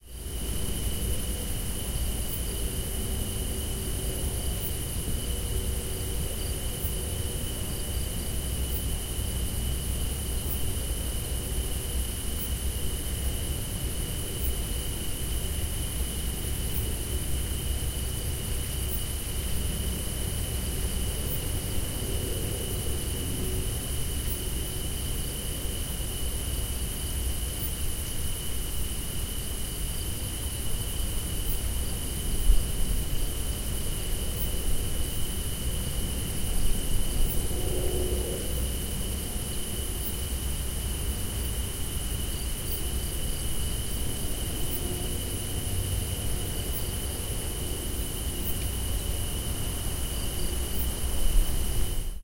Binaural field-recording of rural night ambiance (with a road quite far but still present), in La Segarra, Catalonia